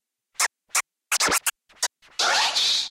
Scratch Fresh 8 - 1 bar - 83 BPM (swing)
Acid-sized sample of a scratch made by me. Ready for drag'n'drop music production software.
I recommend you that, if you are going to use it in a track with a different BPM, you change the speed of this sample (like modifying the pitch in a turntable), not just the duration keeping the tone.
Turntable: Vestax PDX-2000MKII Pro
Mixer: Stanton SA.3
Digital system: Rane SL1 (Serato Scratch Live)
Sound card on the PC: M-Audio Audiophile 2496 (sound recorded via analog RCA input)
Recording software: Audacity
Edition software: MAGIX Music Maker 5 / Adobe Audition CS6 (maybe not used)
Scratch sound from a free-royalty scratch sound pack (with lots of classic hip-hop sounds).
90, hip-hop, rap, scratching, golden-era, s, turntable, classic, scratch